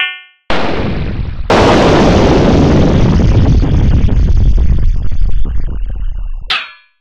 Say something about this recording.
Metal Cling Clang Bang
Sounds I made with PSIndustrializer.
You can get the source files here.
kling, bam, zang, clong, klang, dang, clang, bing, bong, dong, cling, metal, zong, bang, iron, ding, boom, zing, klimpern